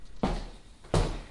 The sound of me walking, has only 2 steps.